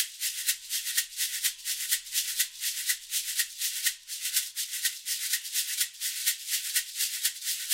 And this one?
Jerusalema 124 bpm - Shekere - 4 bars
This is part of a set of drums and percussion recordings and loops.
Shekere (large plastic gourd with beads) rhythm loop.
I felt like making my own recording of the drums on the song Jerusalema by Master KG.